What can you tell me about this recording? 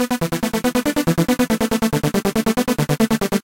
saw 1 140bpm
a happy core/trance riff going up scale
140, bpm, core, fast, goth, guitar, happy, hard, house, mtel, piano, rock, speed, stealth, techno, trance